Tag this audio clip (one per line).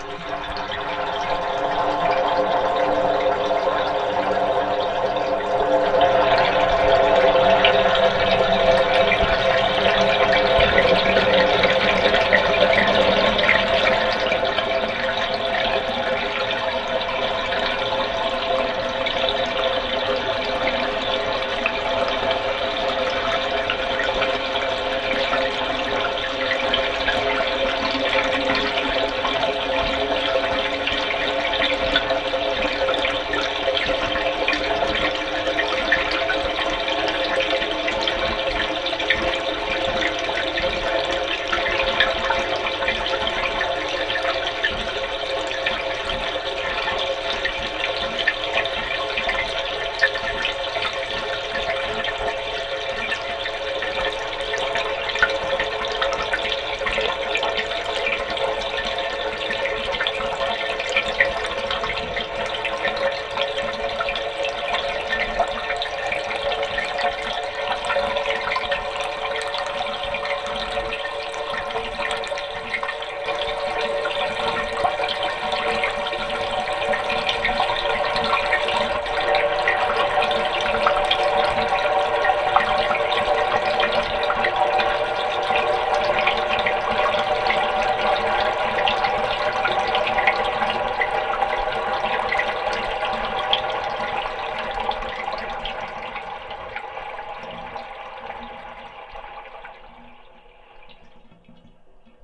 glug
drain
submerged
bubbles
bubble
hydrophone
underwater
gurgle
liquid
water
bubbling